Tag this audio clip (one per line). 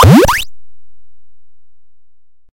8
8bit
retro